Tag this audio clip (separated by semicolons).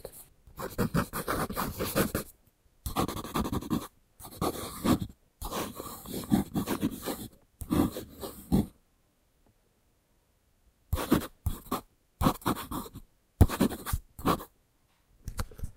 board; Chalk; class; school